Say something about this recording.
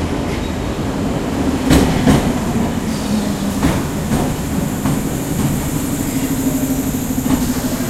London underground 09 train drives by
A train coming to a halt in a London Underground station.
london-underground, field-recording